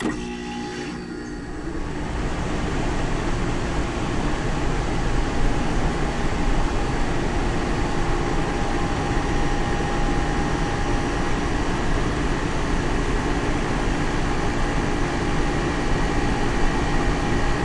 AC start up fan w comp
My window air-conditioner starts up, on the cool setting, so fan and compressor are both on. Please use in conjunction with the other samples in this pack. Recorded on Yeti USB microphone on the stereo setting. Microphone was placed about 6 inches from the unit, right below the top vents where the air comes out. Some very low frequency rumble was attenuated slightly.
AC, Air-conditioner, Close-up, Compressor, Fan, Power-on, Stereo